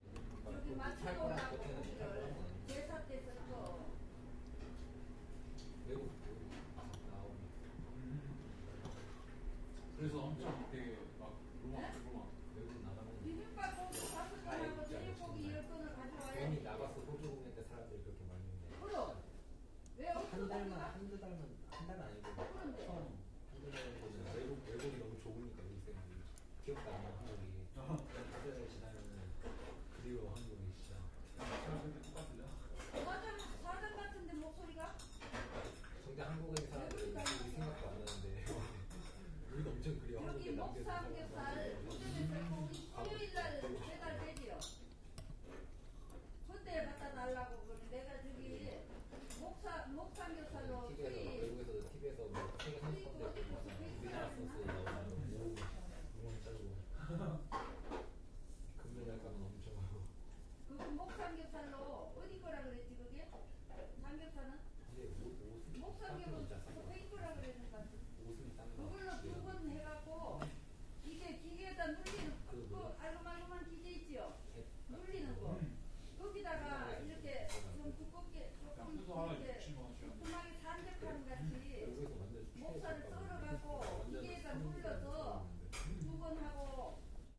0079 Restaurant people
People talk in the restaurant. Sounds from the kitchen
20120116